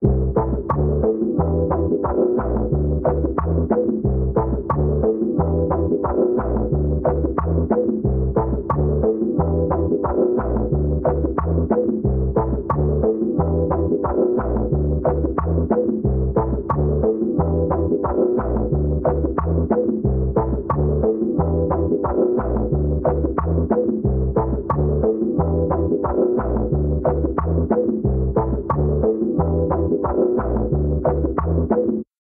Bobby 2 chords track
Chords track of Bobby 2 instrumental
track, salpov